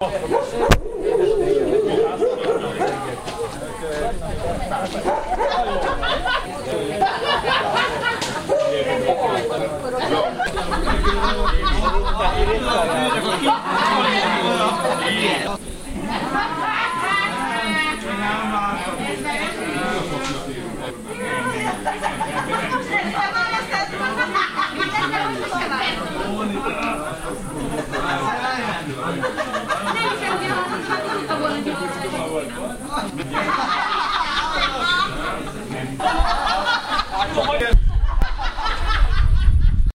Pub in summer
This pub, tavern is summer outdoor on a pleasure resort near Danube. Enaugh a voatile tribe and these sounds, burble come midnight too.